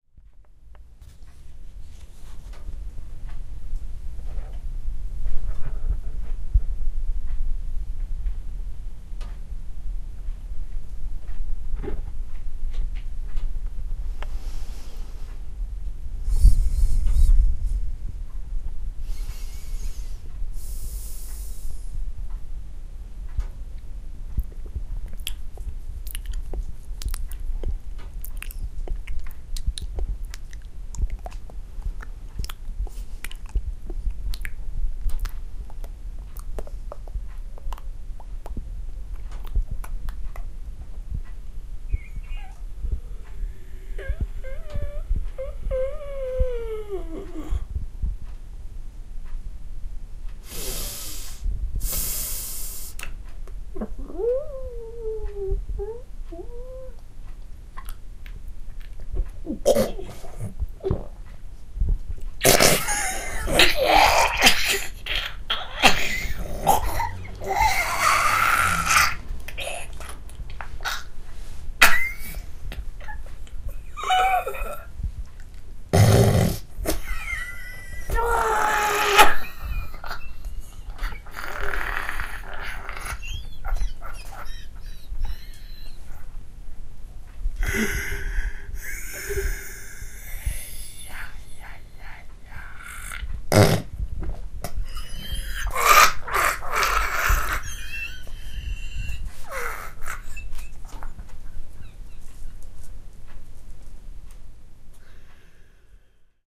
Living room - Atmosphere - Mouth noises & laughing
Recorded in my living room using my Zoom Handy Recorder H4 and it's build in microphone on 29th of May 2007 around 18:00. It starts with the atmosphere in my living room and later I made some smacking noises with my mouth. Listening to those noises made me laugh and listening to my laughing made me laugh even more... normalized and finalized using some plugins within wavelab.